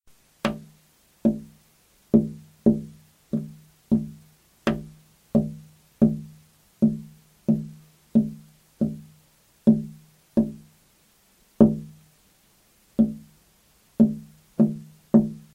Tapping, Window, Single, A
Several single taps and hits on a glass window.
An example of how you might credit is by putting this in the description/credits:
Window, Windows, Panel, Tapping, Glass, Tap, Bash, Hit, Bang, Knock, Single, Taps, Singles, Hits, Pane